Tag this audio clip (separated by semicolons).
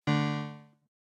Alert; Design; High; Keyboard; Long; Minimal; Notification; Off; On; PSR36; Reward; Short; Sound; Synth; Vintage